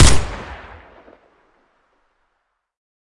Energy Gun Firing 01
Energy Gun Firing
Created and Mixed in Mixcraft 7 PRO STUDIO
guns, shot